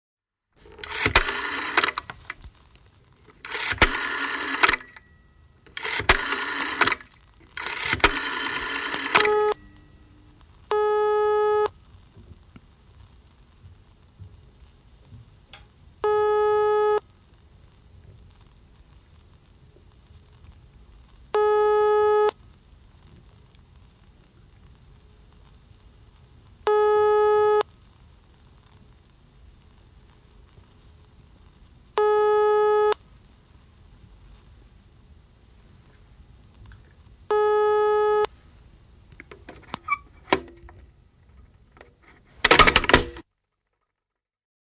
Puhelin, hälytys / Old landline telephone from the 1950s, dialing, alarm signal from the receiver, hang up
Vanha lankapuhelin, 1950-luku, numero valintalevystä, hälytysääni luurista, luuri alas.
Äänitetty / Rec: Analoginen nauha / Analog tape
Paikka/Place: Suomi / Finland / Helsinki
Aika/Date: 08.12.1982
Alarm, Soundfx, Phone, Luuri, Yleisradio, Puhelin, Suomi, 1950-luku, Finland, Telephone, Puhelinlinja, Field-Recording, Finnish-Broadcasting-Company, Receiver, Yle, Tehosteet, Lankapuhelin